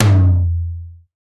tom dnb
made by mixing synthesized sounds and self-recorded samples, compressed and EQ'd.
drum-n-bass, fat, tom, tom-tom